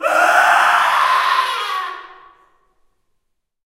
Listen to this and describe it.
Male screaming in a reverberant hall.
Recorded with:
Zoom H4n